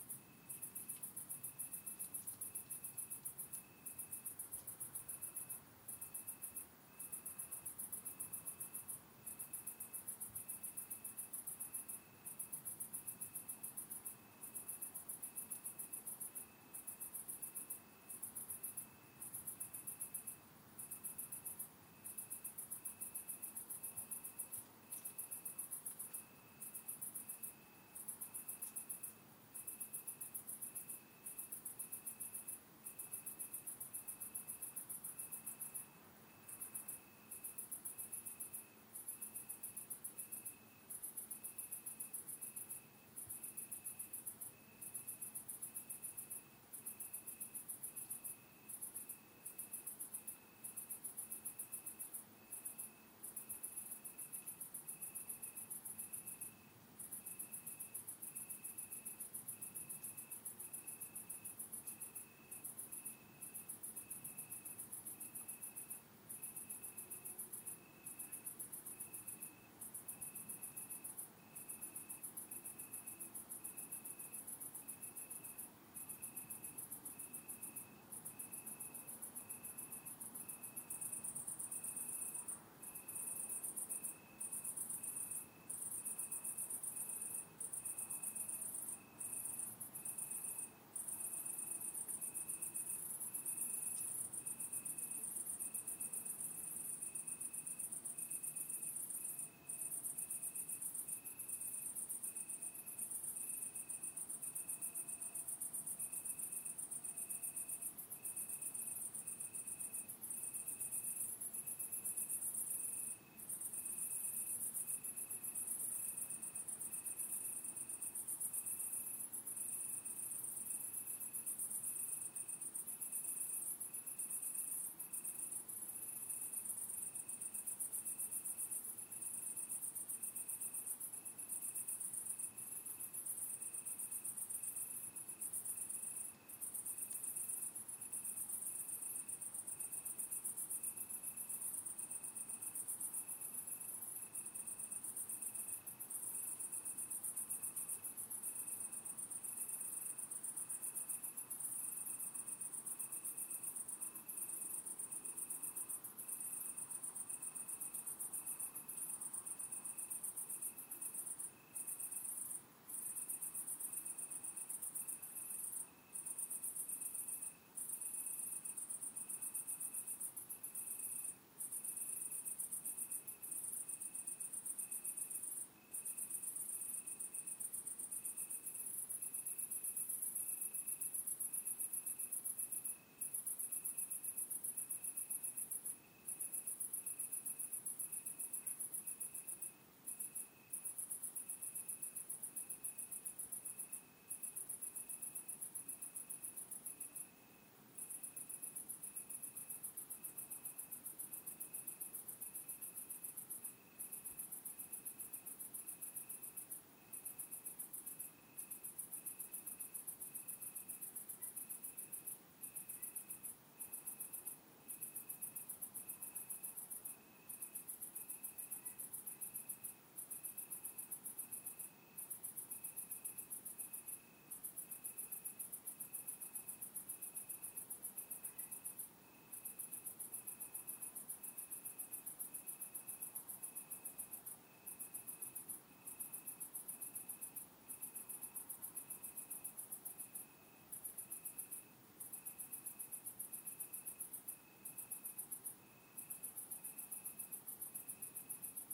One begins on his own, then another joins in half way.
Ambience, Bruere-Allichamps, France, Crickets, Night, Cicada